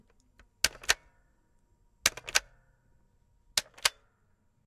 X-Shot Chaos Meteor Trigger Sound. This is the noisiest Nerf Gun I own, it performs similar to the Rival Series but the sounds are superb!
Ball, Blaster, Chaos, Dart, Foam, Gun, Nerf, Nerf-Gun, Pistol, Plastic, Rifle, Rival, Shooting, Shot, Toy, Xshot, X-Shot